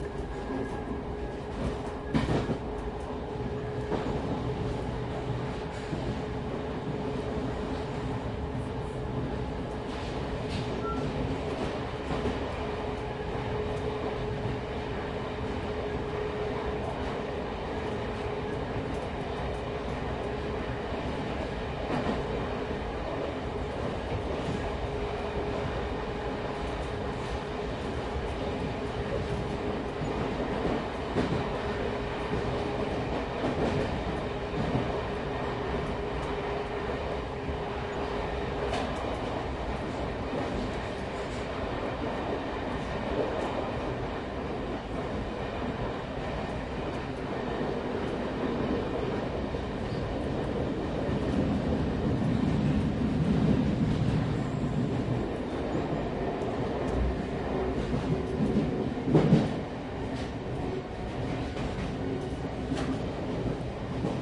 Train Tube Int In Transit
Transit, Tube, Underground, Train, Voices